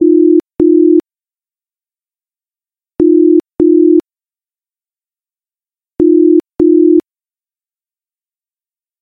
Example of the British-style telephone ringing tone, namely 400hz and 440hz in a 0.4, 0.2, 0.4, 2.0 sequence, repeated several times.